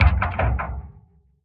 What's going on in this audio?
Bumping Against Metal 2
Low-frequency bump against metal.
It could be used for someone or something bumping into a metal surface.
Low-frequency metallic thud and rumble; mid-frequency and high-frequency metallic hit.
Designed sound effect.
Recording made with a contact microphone.
low-frequency, hit, impact, thudding, metal